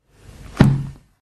Closing a 64 years old book, hard covered and filled with a very thin kind of paper.
book, noise, household, lofi, paper, loop, percussive